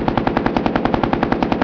AK47 loop
A AK47 firing. This track can loop.
47, ak, ak47, gun, loop, mix